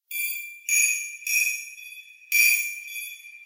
A metallic key hitting a mug, with db amplification and Reverb.